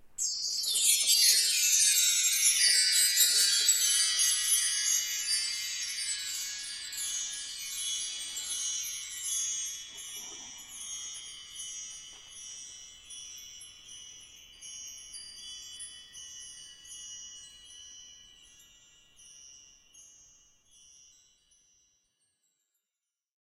Bar chimes 02
Orchestral bar chimes sample, made with a Sony Minidisc
Bar, chimes, orchestra